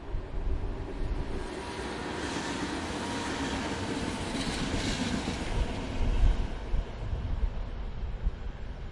Electric train 01
Electric train leaves train station.